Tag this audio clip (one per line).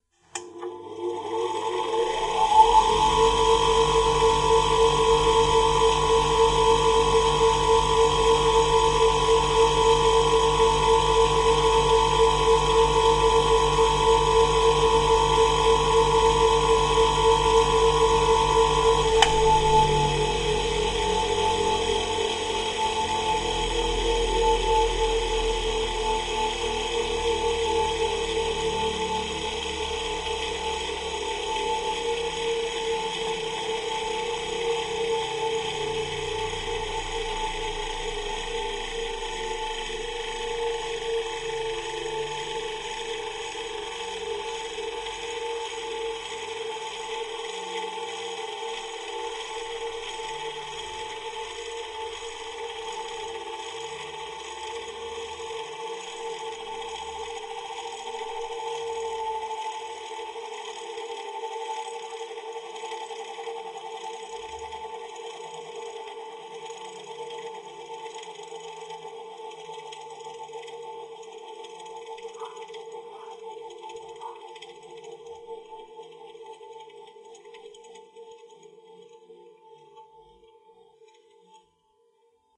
sound-effects
grinder